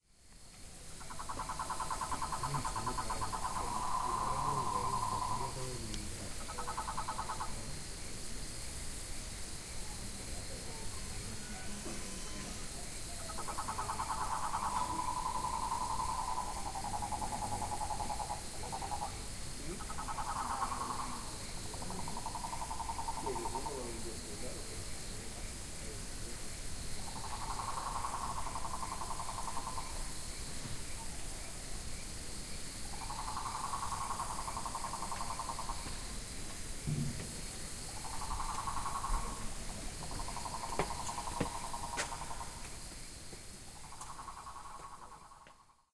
I´ve recorded this in the jungle of Coroico, Bolivia, during the night